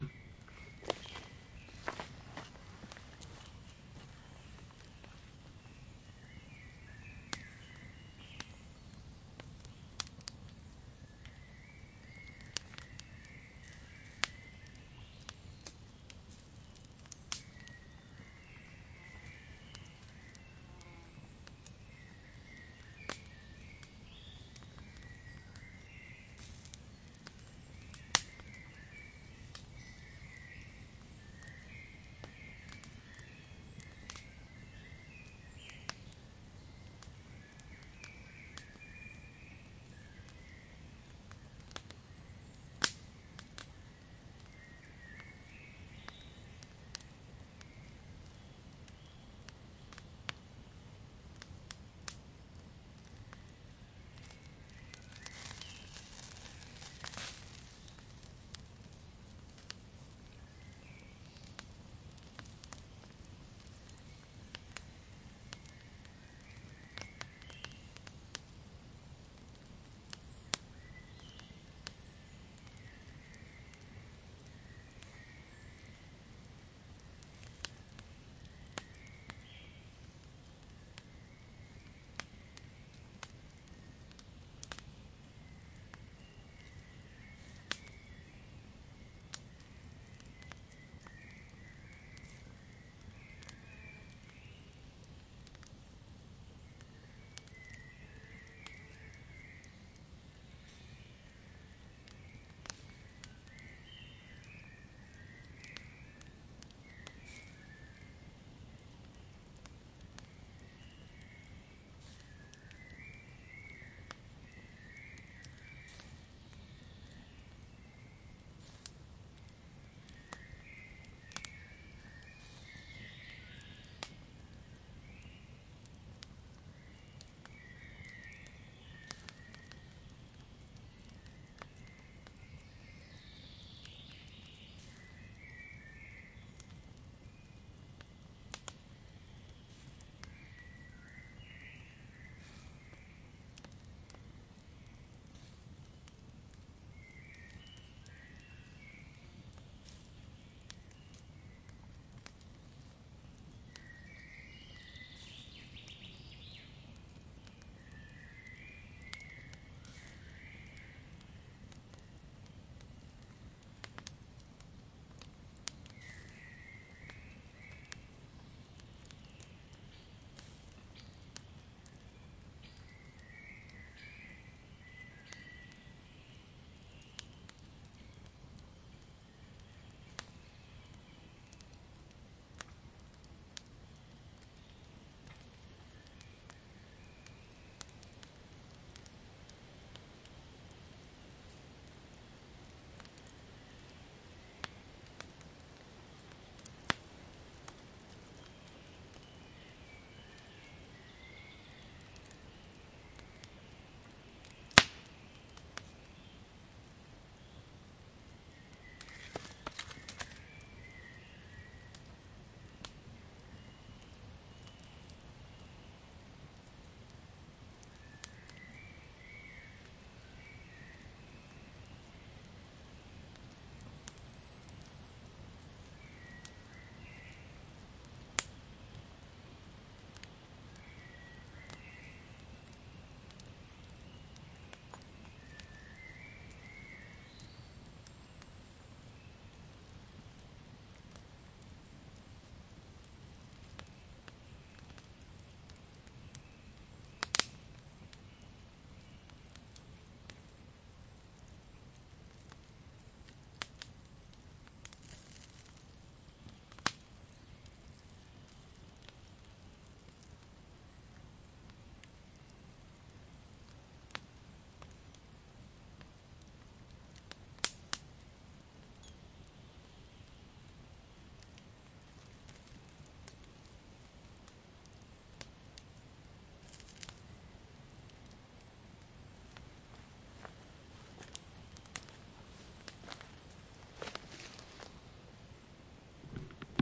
The sound of the fire / burning wood in the woods front
place, forest, nature, burning, sound, fire, field-recording, front, birds, woods, trees, wood, The